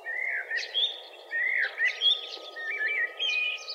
blackbird.mix
two fragments of a Blackbird song which I found particularly musical and meaningful. These fragments were actually consecutive, my edition consisted simply of removing the silence in the middle.
birds, mix